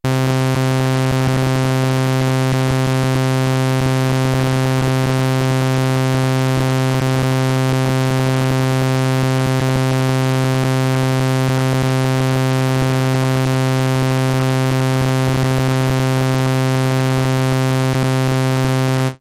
Mopho Dave Smith Instruments Basic Wave Sample - SAW C2
basic, dave, instruments, mopho, sample, smith, wave